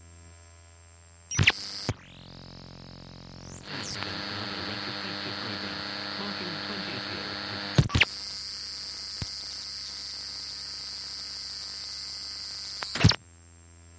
Radio Noises & Blips
some "natural" and due to hardware used radio interferences
interferences, radio